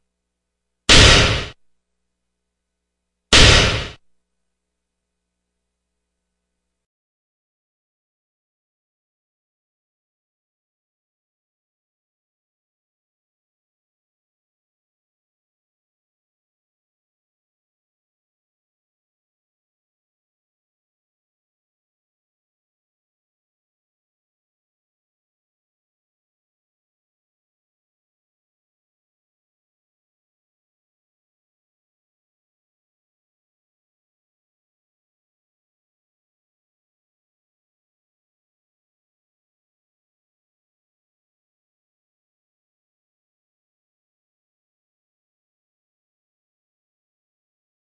A distorted clank/snare.
clank, crash, distorted, factory, industrial, machine, machinery, mechanical, robot, robotic, snare